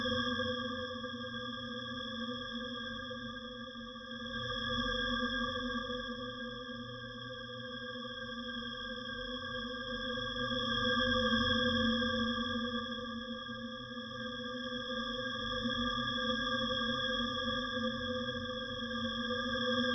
Synthetic ambiance reminiscent of planetary weirdness sounds from Star Trek, though it is not intended to emulate those. I can imagine this being used as just one component (drone) of any other-worldly situation. Just add the sonic sprinkles of your choice. All components of this sample were created mathematically in Cool Edit Pro.